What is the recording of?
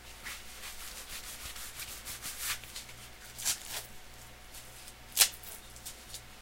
Small woman's wrist brace with (2) velcro latches applied and fastened